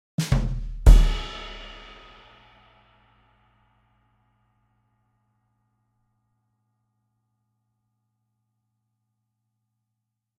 Ba-dum tss
My first sound effect
This sound effect is for jokes
Drums, Funny, humorous, Joke